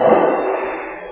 Bonks, bashes and scrapes recorded in a hospital at night.
hit, percussion, hospital